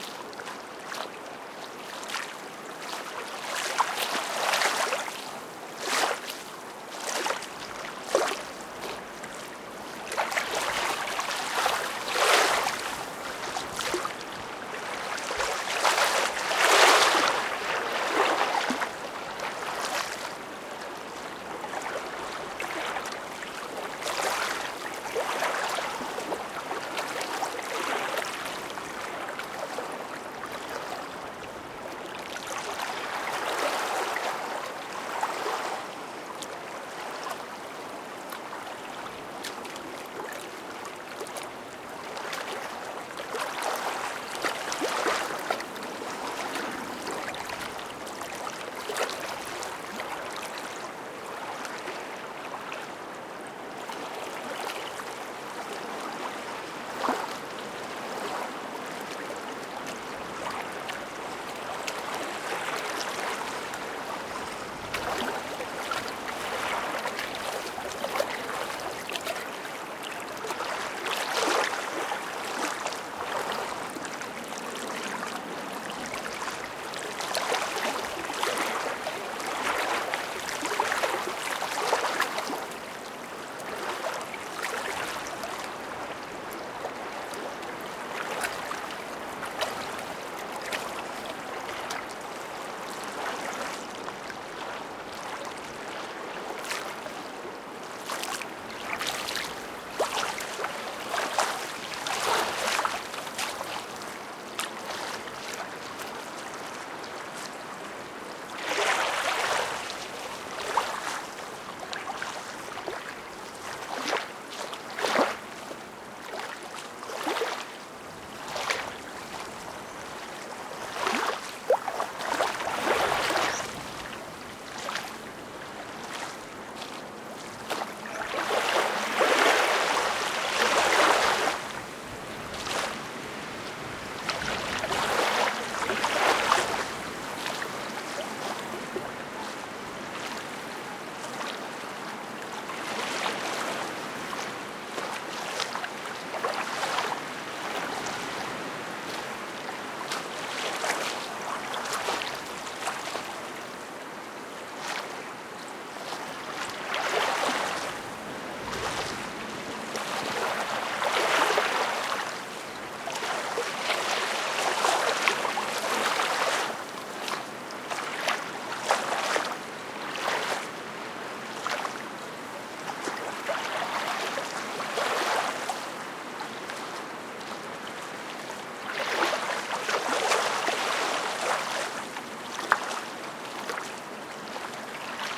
20061208.waves.03.mono
sound of waves, a windy day in a marshland. Mono recording.
wind, field-recording, water, autumn, waves, marshes, ambiance, nature